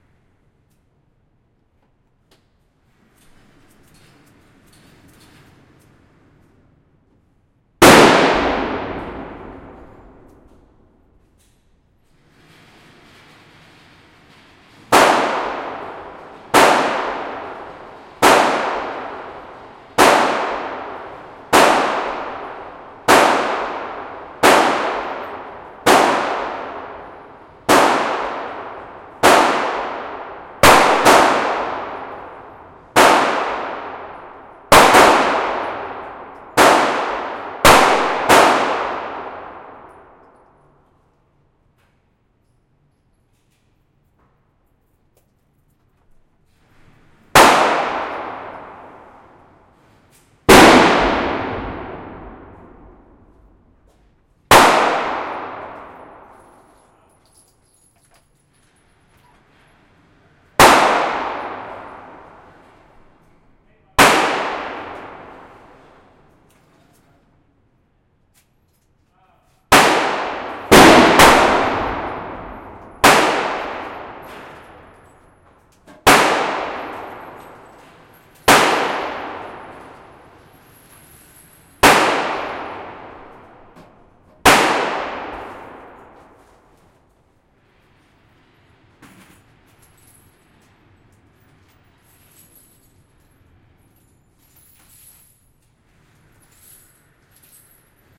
Indoor Guns
Indoor Ranch sound. TEXAS GUNS.